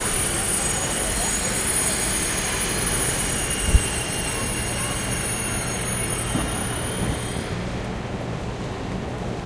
London underground 01 train stopping
In the London underground station a train arrives, the sound of its brakes is a bit painful.
brakes, braking, field-recording, train, tube